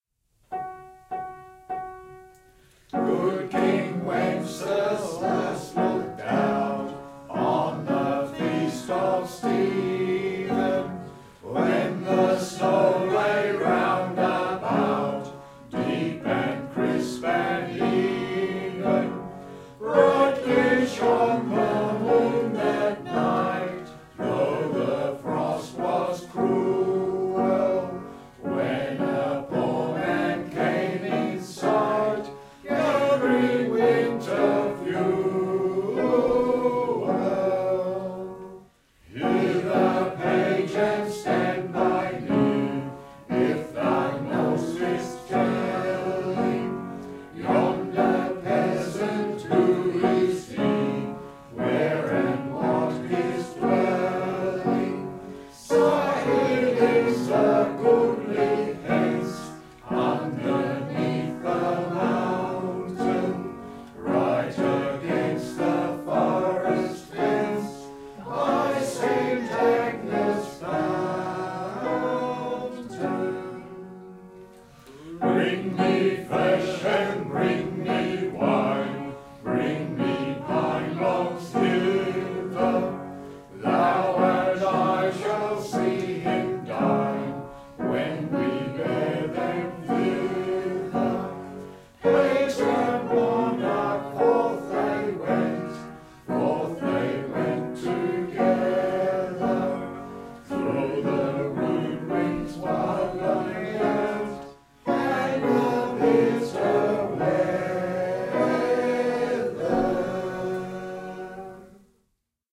Good King Wenceslas
Group of 18 Australians singing the first three verses, in a lounge room, with a piano. Recorded on a Marantz PMD 661, 6 September 2019, with a Rode NT4.
carol, gathering, Christmas, family, home, traditional